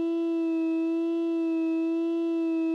The vowel “I" ordered within a standard scale of one octave starting with root.
formant, speech, supercollider, voice